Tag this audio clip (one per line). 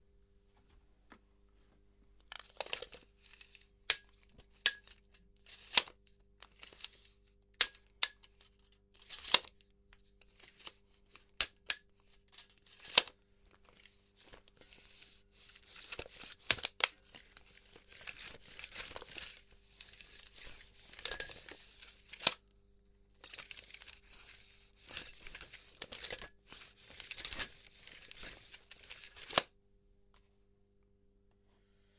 Measure-tape
Measuring
OWI
Tools